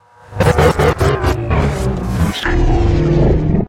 Another transformer sound